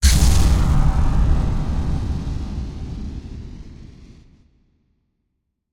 Missile Blast 2
A warm, fuzzy explodey sound effect with added "inhuman" synth made from noise generation. This version sounds similar to "Lifeforce Combustion" but more subtle and cleaner.
Inspired by the "Homing Missile" sound in Twisted Metal.
explode, missile, attack, explosion, inhuman